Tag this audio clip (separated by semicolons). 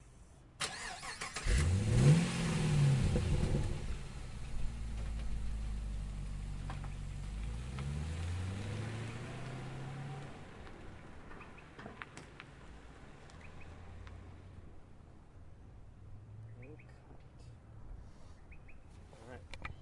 car; drive-off; holden; ignition; revving; ssv; ute; vehicle